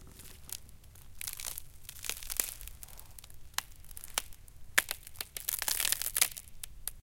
Ice Crack 4
crack, melt, ice-crack, foley, ice, break